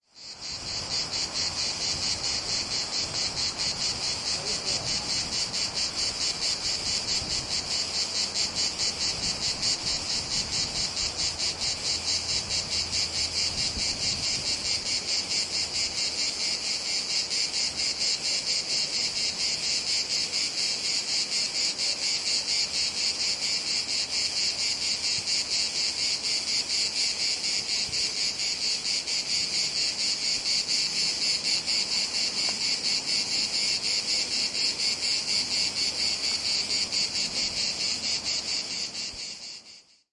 Sound of cicadas during a summer day in a forest in South of France. Sound recorded with a ZOOM H4N Pro and a Rycote Mini Wind Screen.
Son de cigales lors d'une journée d'été dans une forêt du sud de la France. Son enregistré avec un ZOOM H4N Pro et une bonnette Rycote Mini Wind Screen.